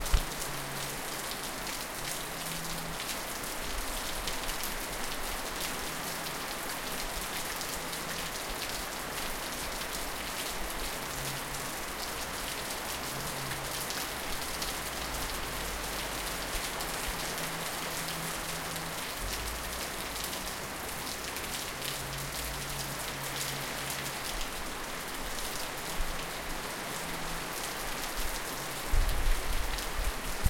Another sound of rain.
city, fiel, field-recording, nature, rain, raining, weather